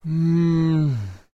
male voice mmhhhhuhhmmm
Male sigh. Recorded in the anechoic chamber at the USMT.